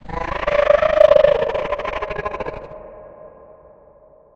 I create this sound for this animación:
- Effect→Change Speed...
Speed Multiplier: 0.2
- Effect→Tremolo
Waveform type: sine
Starting phase (degrees): 0
Wet level (percent): 100
Frequency (Hz): 10.0
- Effect→Change Speed...
Speed Multiplier: 2.0
- Effect→Tremolo (again)
Waveform type: sine
Starting phase (degrees): 0
Wet level (percent): 100
Frequency (Hz): 10.0
- Effect→Amplify...
New Peak Amplitude (dB): -3.0
• Select from 3.657s to 5.692s
- Generate→Silence…
Duration: 00h 00m 02+01521 samples
- Effect→Reverb...
Room Size: 67
Pre-delay (ms): 99
Reverberance (%d): 93
Damping (%): 64
Tone Low (%): 100
Tone High (%): 16
Wet Gain (dB): 4
Dry Gain (dB): 6
Stereo Width: (%): 100
- Effect→Change Tempo...
Percent Change: 30.986